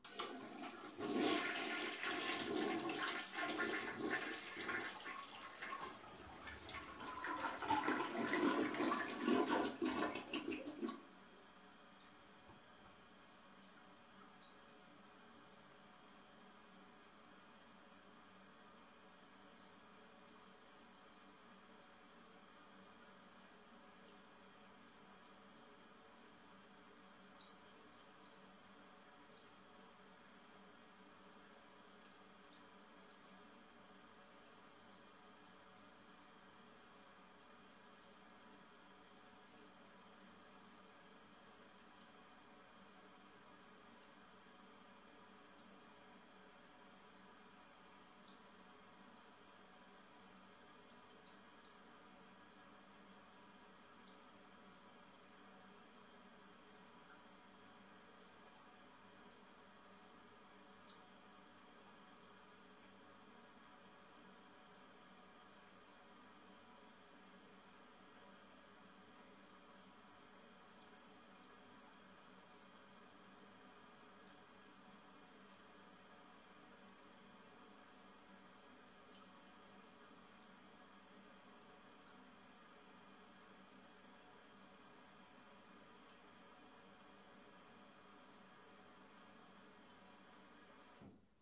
Toilet Flush far
toilet flushing recorded from 10 feet away
bathroom, toilet, water, flush